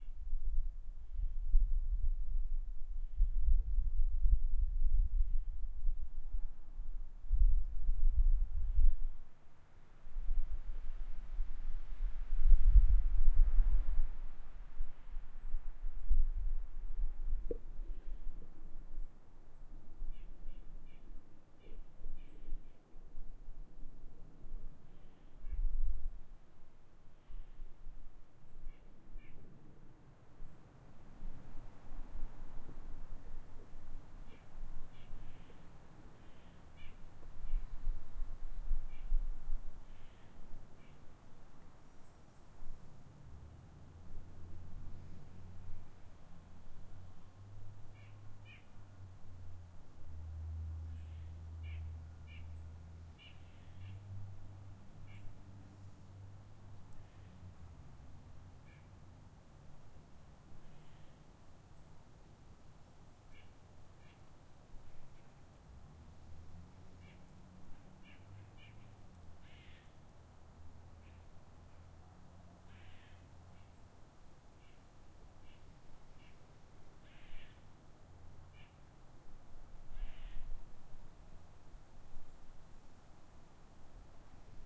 Outside a suburban home. birds chirping and cars in distance
outside, field-recording, suburbs